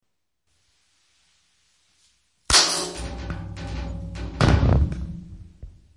vidrio roto
dejar caer bombillo
bombillo, caida, roto